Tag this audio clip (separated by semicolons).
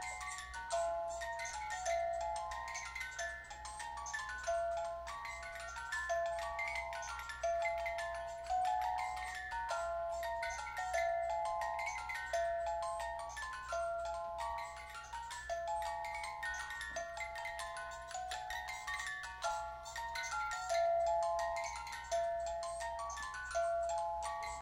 dummy-head; test; binaural-imaging; height; binaural; vertical-localization; headphones; 3d; localization; coronal-plane; height-perception; out-of-head-localization; sound-localization